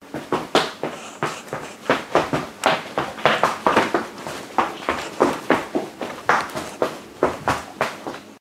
Footsteps running on the floor
A sound effect of footsteps running on a floor
footstep, step